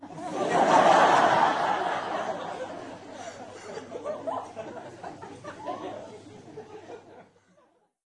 LaughLaugh in medium theatreRecorded with MD and Sony mic, above the people
crowd, audience, theatre, czech, prague, auditorium, laugh